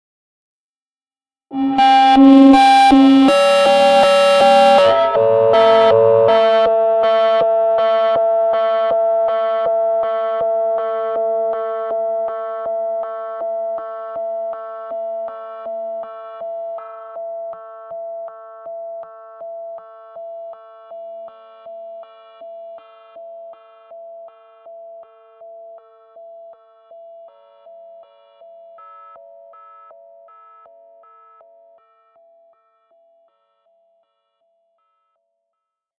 VIRAL FX 06 - C3 - LOST GUITAR with fading delay
Created with RGC Z3TA+ VSTi within Cubase 5. Some guitar 2-tone feedback with a delay that's fading away slowly. The name of the key played on the keyboard is going from C1 till C6 and is in the name of the file.